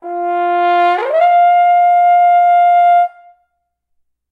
A horn glissando from F4 to F5. Recorded with a Zoom h4n placed about a metre behind the bell.